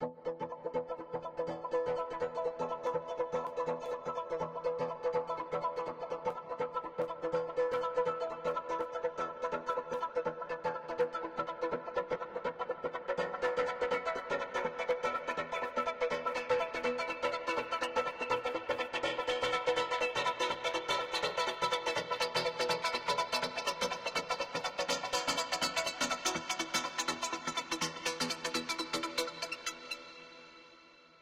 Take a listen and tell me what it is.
A guitar-like synth "arpeggiated" sequence. In a wide space.
Part 1 : light.
Arpeggio, Plucked, Saturation, Space, Strings, Synth, Tape, Wide